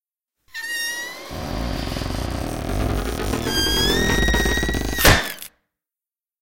ballon platzt
combined several archive-sounds to get the impression of a balloon bursting because of to much pressure.
balloon, bursting, peng, platzen, sounddesign